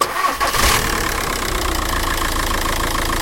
Diesel engine start
engine, start